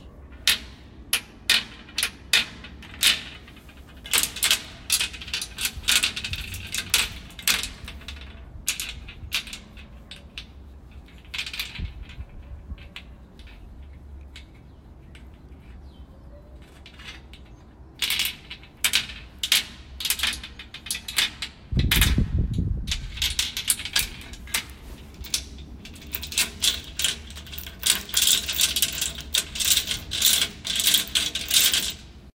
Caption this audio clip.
padlocks and chains
chains, noise, padlock